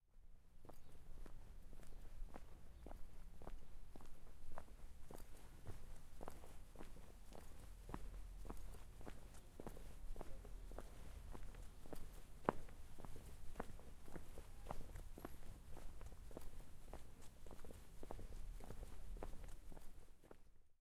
Ronda - Steps in the stone - Pasos sobre piedra
Walking in stone, near the ancient walls of the city. Recorded in a quiet Sunday morning in Ronda (Málaga, Spain) with a Zoom H4N.
Caminando sobre piedra, cerca de las antiguas murallas de la ciudad. Grabado una tranquila mañana en Ronda (Málaga, España) con una Zoom H4N.